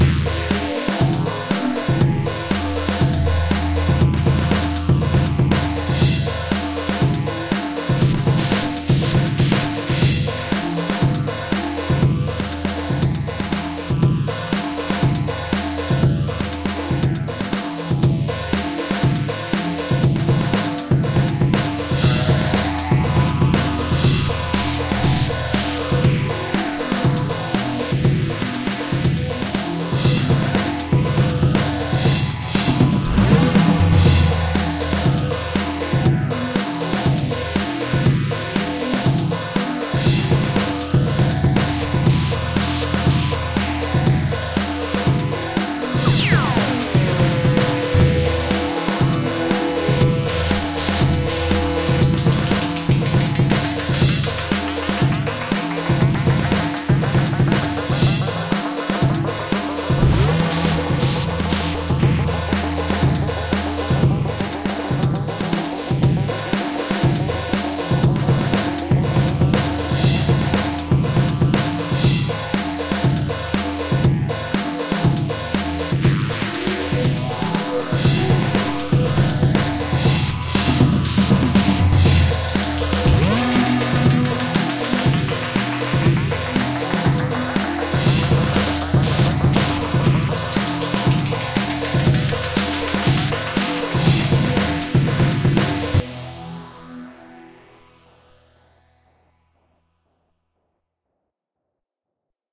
UF-Nervous Pursuit
Excited and aggressive piece of music. Made with Nlog PolySynth and B-step sequencer, recorded with Audio HiJack, edited with WavePad, all on a Mac Pro.